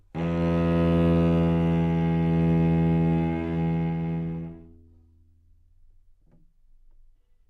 Cello - E2 - bad-pitch
Part of the Good-sounds dataset of monophonic instrumental sounds.
instrument::cello
note::E
octave::2
midi note::28
good-sounds-id::4438
Intentionally played as an example of bad-pitch
multisample, neumann-U87, cello, E2, single-note, good-sounds